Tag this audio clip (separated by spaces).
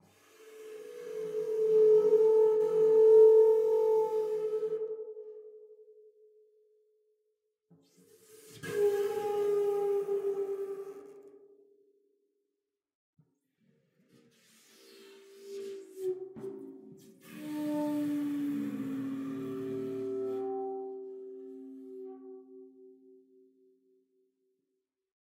dark flickr noise reverb superball timpani unprocessed wind